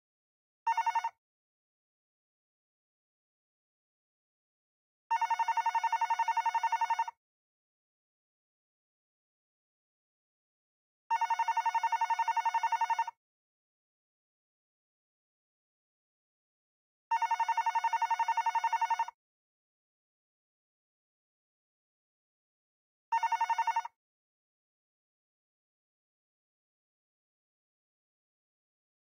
A Hotel Phone ring recorded with a shotgun mic into an H4N.